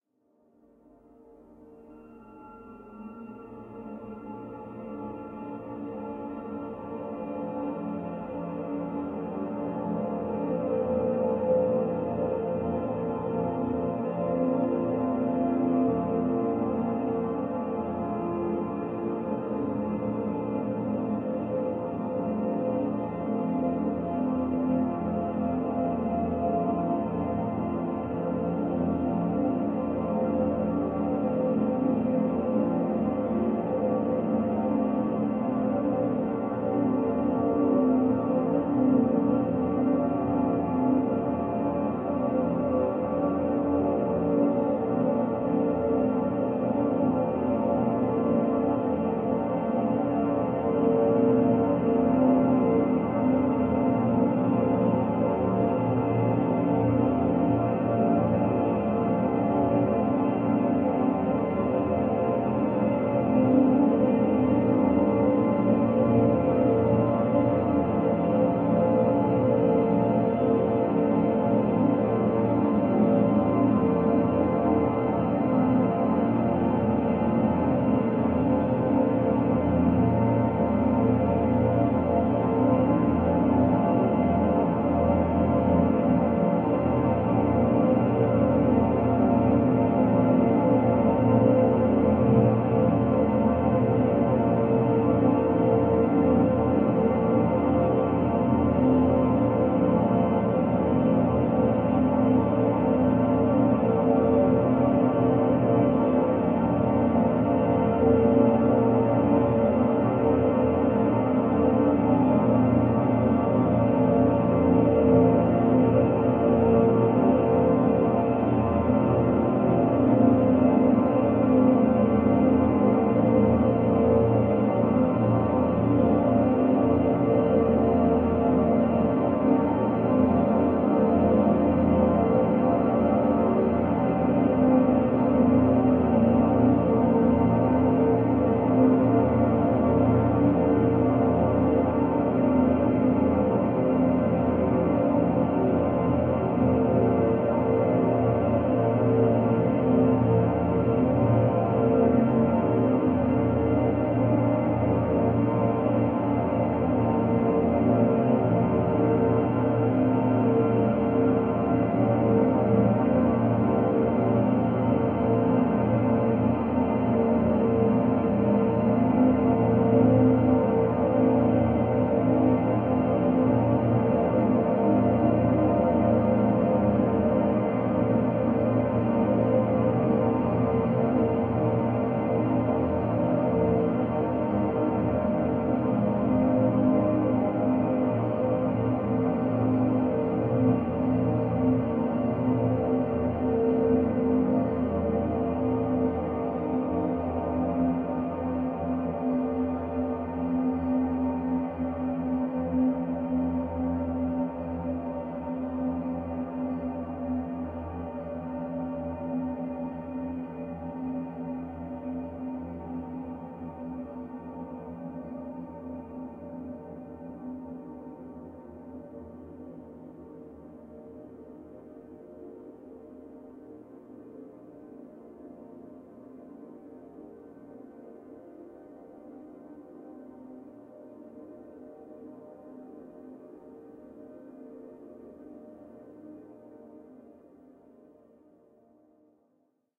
LAYERS 018 - ALCHEMIC HUNTING was created using Camel Audio's magnificent Alchemy Synth and Voxengo's Pristine Space convolution reverb. I sued some recordings made last year (2009) during the last weekend of June when I spent the weekend with my family in the region of Beauraing in the Ardennes in Belgium. We went to listen to an open air concert of hunting horns and I was permitted to record some of this impressive concert on my Zoom H4 recorder. I loaded a short one of these recordings within Alchemy and stretched it quite a bit using the granular synthesizing method and convoluted it with Pristine Space using another recording made during that same concert. The result is a menacing hunting drone. I sampled every key of the keyboard, so in total there are 128 samples in this package. Very suitable for soundtracks or installations.